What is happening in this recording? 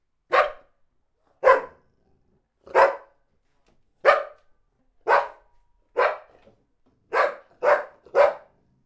My midsize dog barking. Recorded with Zoom H1.
Meu cachorro de médio porte latindo. Gravado com Zoom H1.